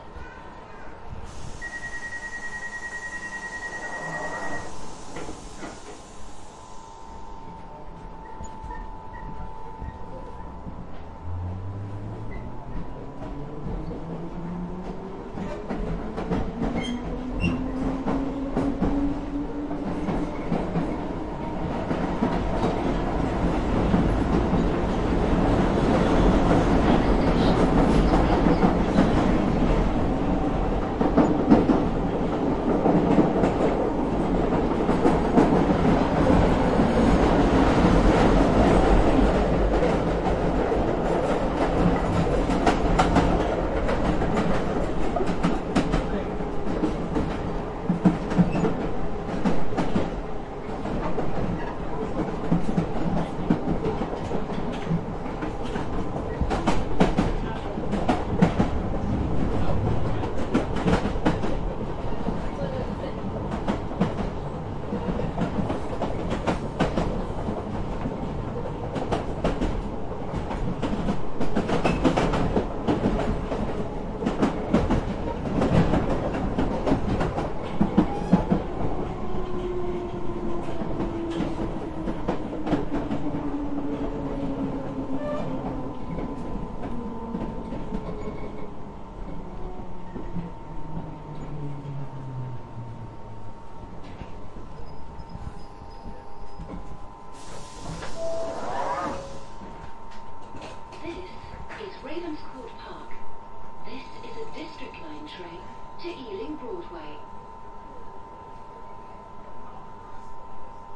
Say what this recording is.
Recording of a tube journey, starting at one stop and ending at Ravenscourt Park in London. Includes an announcement at the end and the beeping noise indicating the doors are about to close on the tube at the beginning.
train, ravenscourt, announcement, london, station, underground, field-recording, london-underground, tube